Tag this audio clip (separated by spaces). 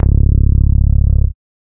goa pack set sub base psy trance